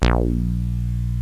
progressive psytrance goa psytrance